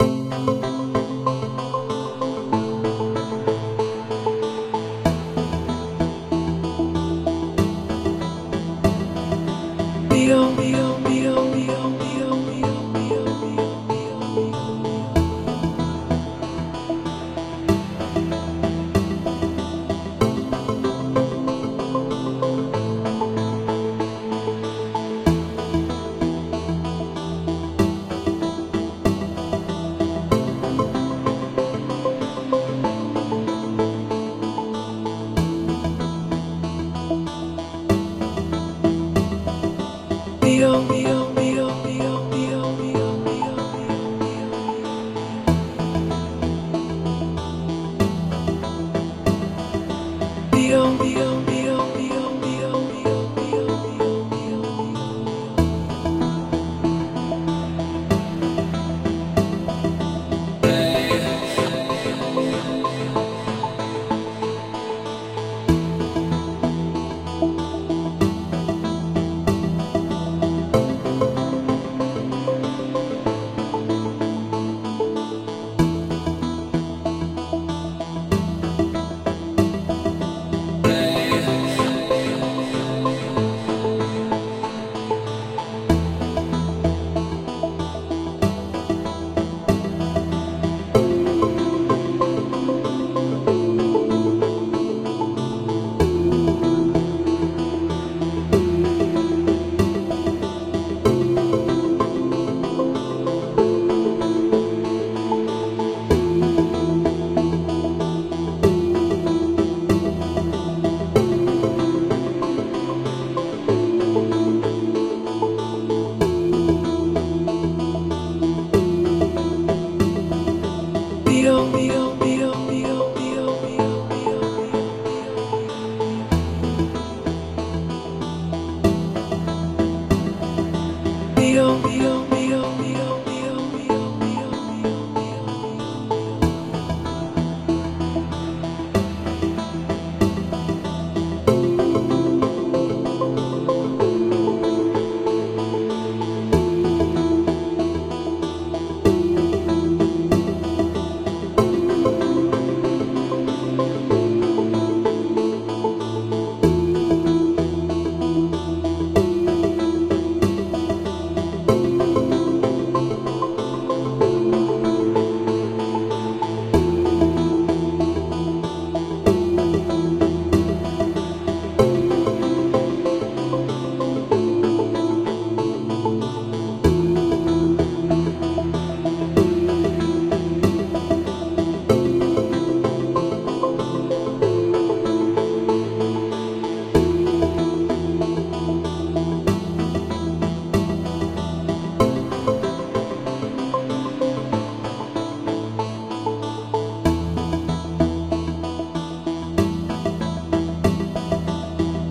electronic music intro.
synth:silenth1,Massive,Ableton live.
frankunjay original track.
ambience, atmosphere, cinematic, dark, electro, electronic, intro, music, noise, pad, processed, sci-fi, soundscape, synth, voice